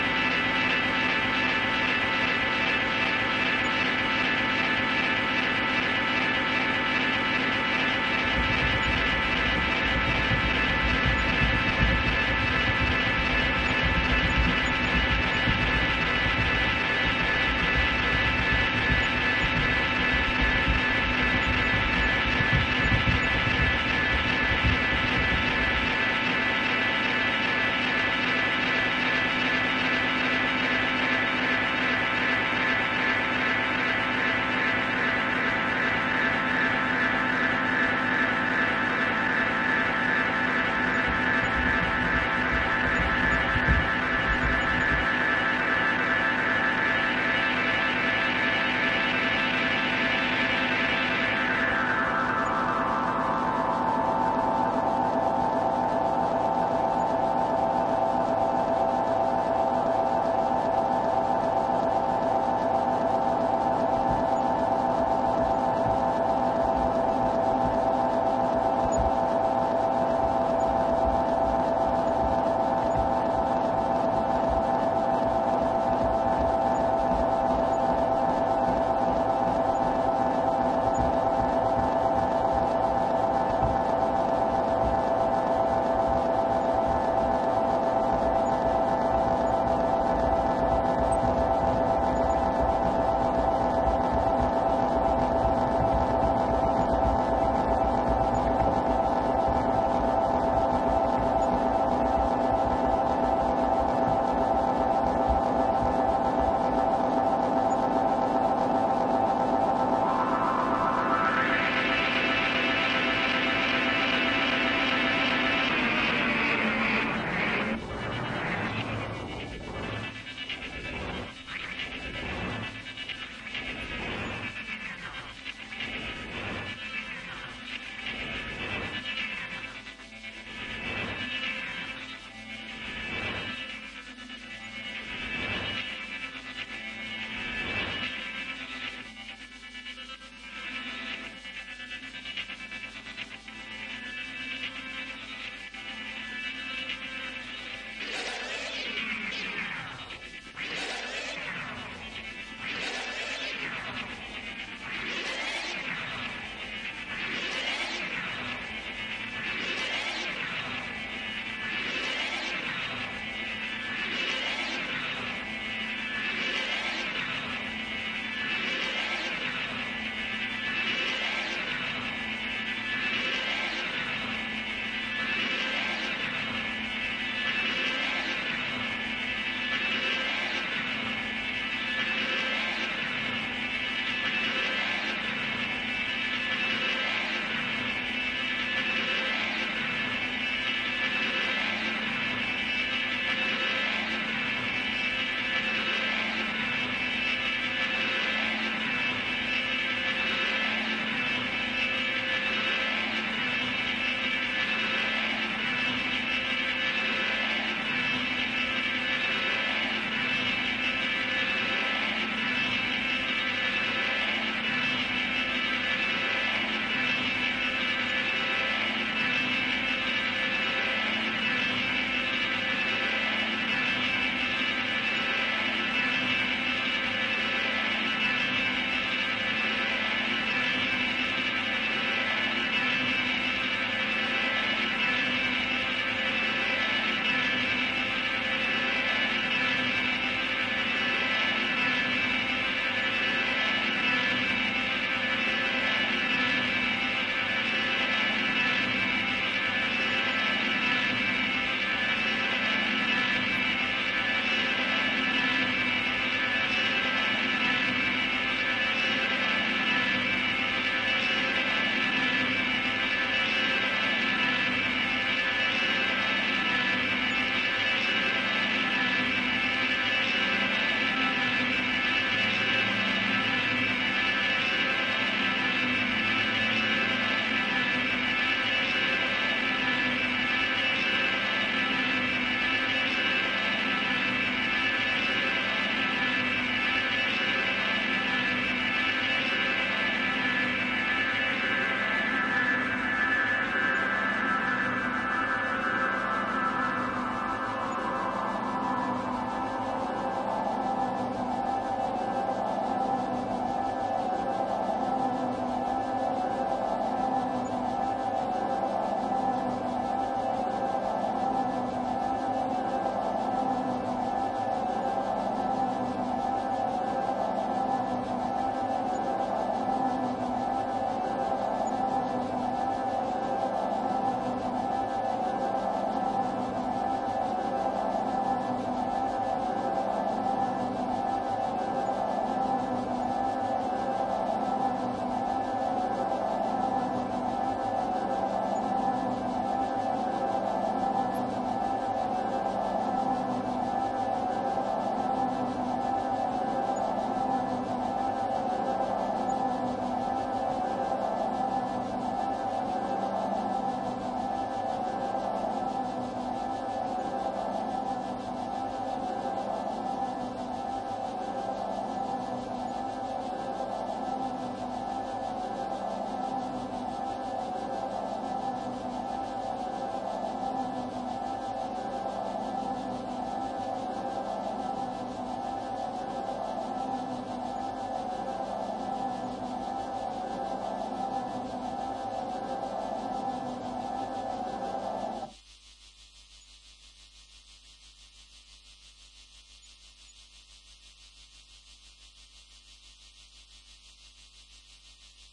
Ambient Telecaster
Recorded with a Telecaster, an echo, a whawha, a vocoder, a microphone, a sweaty looper, and a volume pedal. the beat is marked by the eco
ambient, echo, guitar